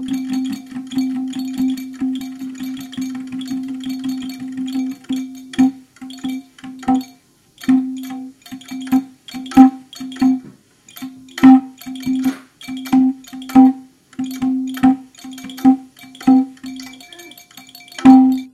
improvisation on madal
drum, madal, nepal